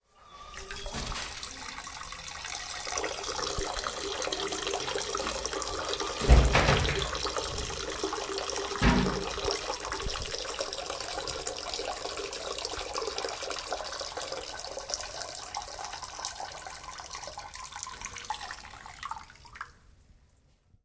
Piss flow
Sound of a male pissing in the water of a toilet for quite a while.
Sounds as water falling into water. Pretty low in frequency for a pissing because of the power flow and the deep of the toilet water.